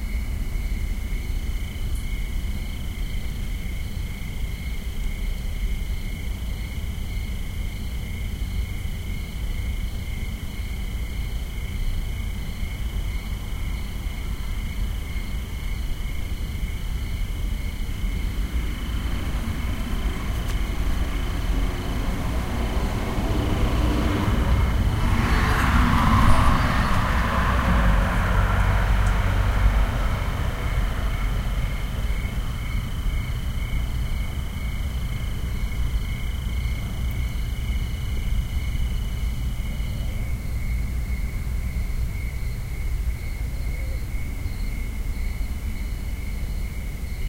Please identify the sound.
Ambient recording made at night. One can hear crickets, and a car with music playing passing by.
Sonic Studios DSM-6 > Sony PCM-M10.